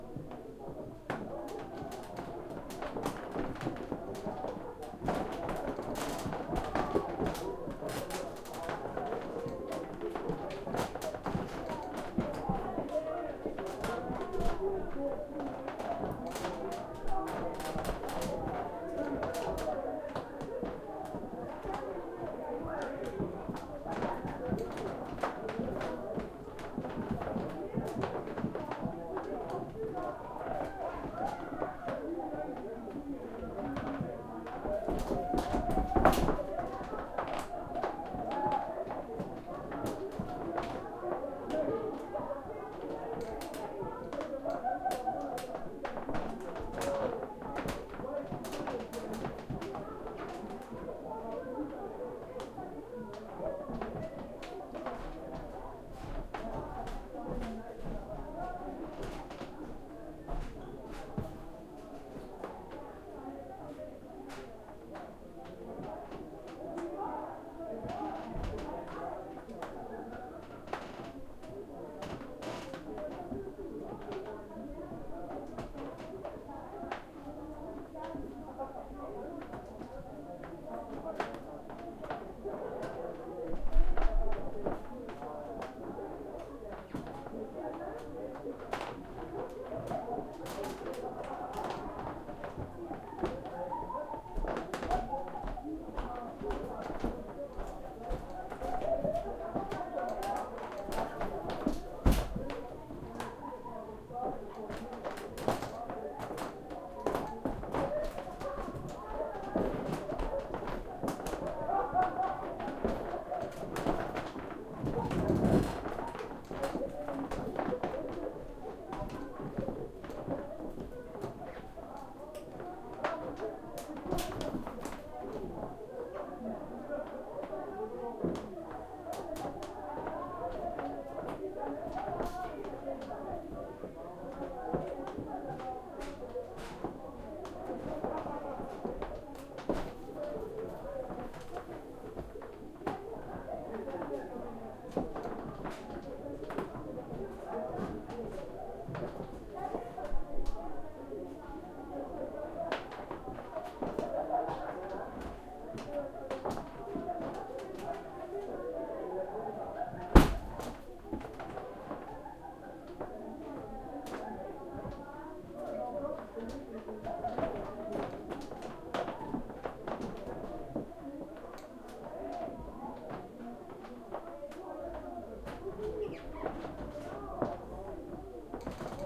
office party upstairs crowd lively voices and creaky footsteps wood floor some walking off1
office, wood, footsteps, party, floor, voices, crowd, lively, upstairs, creaky